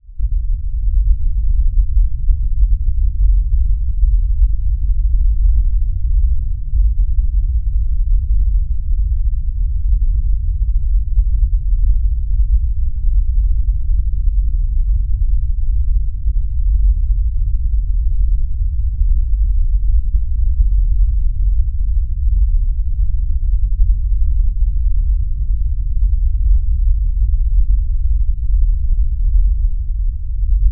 Very low rumbling Bass...like in the movies